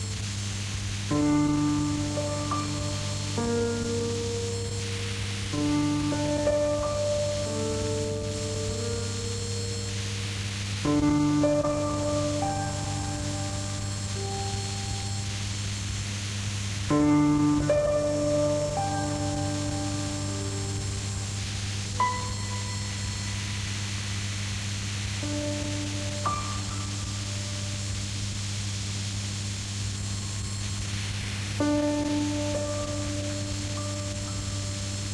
s piano to tape
Some piano notes recorded to a bad tape with Panasonic RQ-A220 player/recorder/radio.
piano, cassette, noise, lo-fi